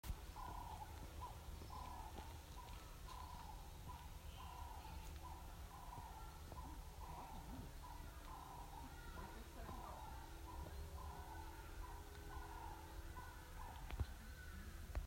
Pine forest bird calls, Eastern Cape
A bird calls in a pine forest in Maclear, Eastern Cape, South Africa
pine-forest, Eastern-Cape, bird, South-African-bird